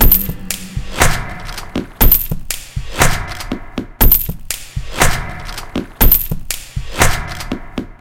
Percussive Loop 2
This is another percussion loop I made from items lying around my house.
percussion-loop, percussion